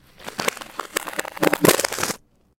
Ice 6 - reverse
Derived From a Wildtrack whilst recording some ambiences